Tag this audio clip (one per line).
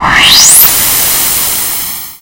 electronic
power-up